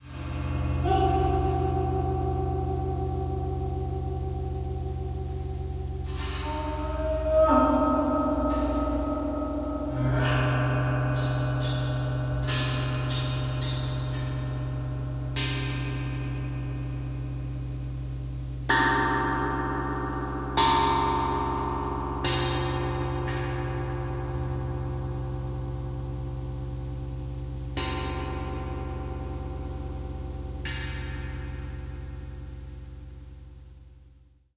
Gong microphone
Gong used as a microphone using piezo transducers. All sounds in this recording were made without touching the gong.